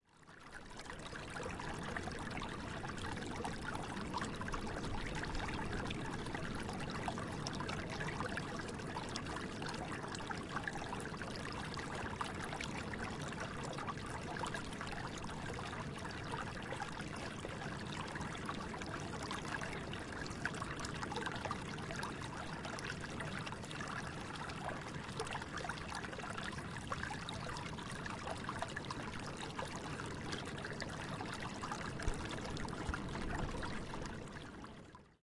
reef-emptying-water
Some sea-sounds I recorded for a surfmovie. It features a reef that empties. Recorded in Morocco
sea, background, reef, shore, away, emptying, water, morocco, distant